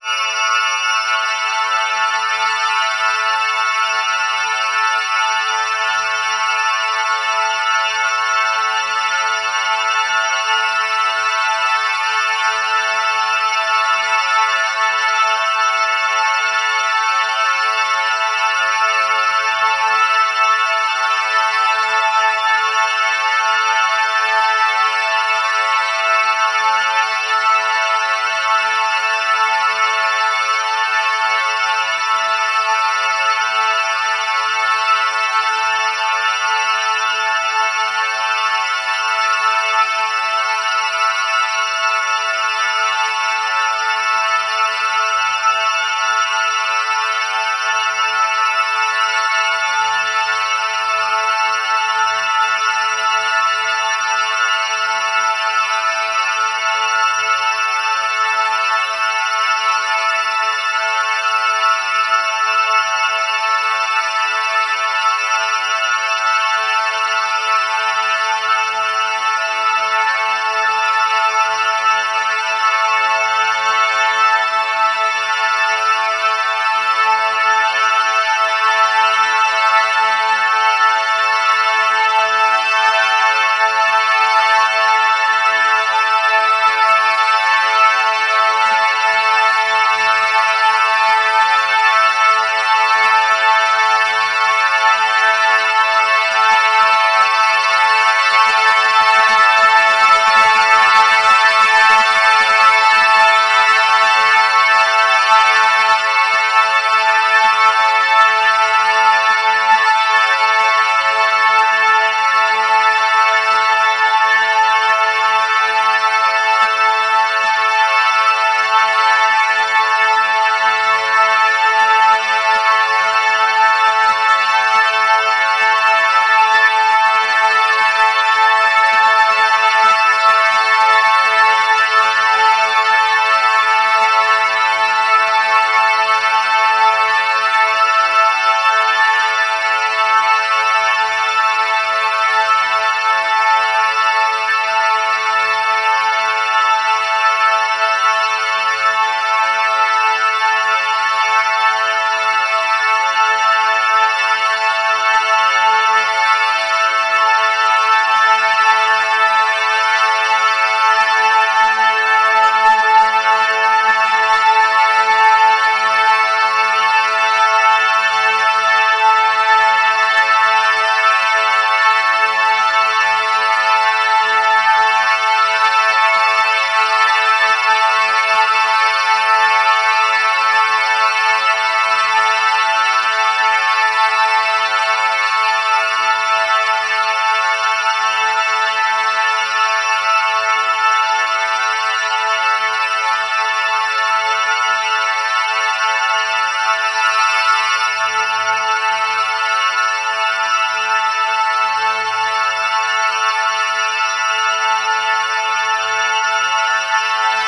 Edited version of one of my urban ambient buzzing bug recordings filtered with harmonics in Paul's Extreme Sound Stretch to create a ghostlike effect for horror and scifi (not syfy) purposes.